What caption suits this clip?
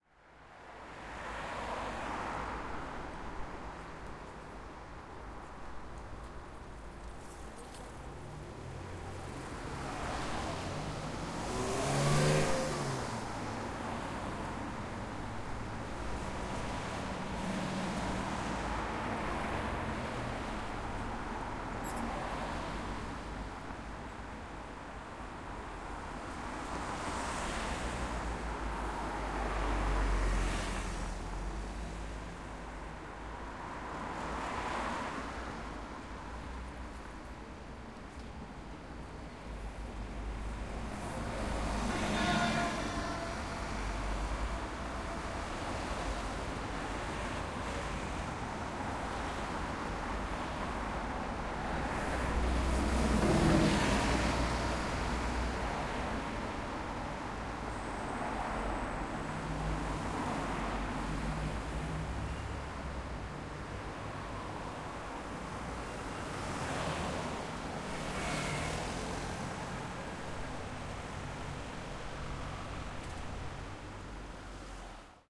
Recorded in a bus stop on a high street in London, cars, buses and bikes passing (mostly from left to right)